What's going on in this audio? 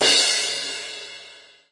02 Crash Med Cymbals & Snares
click, drumset, 7A, drumsticks, sticks, crash, Oak, cymbals, Stagg, ride, drum, Maple, Weckl, cymbal, turkish, hi-hat, 5A, Rosewood, snare, Brahner, 2A